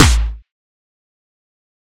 A club snare that I've used in a song. :) Thank you!
Made in Logic Express 9 by layering a snare on top of a clap and a kick.